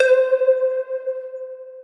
Kygo Real Attack Lead 3 Nexus Pluck
This is a recreation of the ACTUAL steps that Kygo took to make his classic pluck. First, I opened ReFX Nexus 4, loaded up the preset "Attack Lead 3," turned off the built-in delay and reverb, then I routed it to a mixer track, where I loaded Guitar Rig 6. From there, I loaded the "3D Mangler" preset, and adjusted the knobs as follows:
Res: 0.45
Rate: 0.46
Mod Depth 1: 0.13
Reverb: 0.63
Then I EQed out the lower frequencies, and made it brighter.
Finally I added dblue Crusher and made a nice bitcrushing effect at level 3 sample reduction.
If you didn't understand this, no matter! Just use the sample and enjoy!
bass, beach, club, dance, edm, electro, electro-house, electronic, fx, house, kygo, lead, loop, pluck, sample, synth, techno, trance, tropical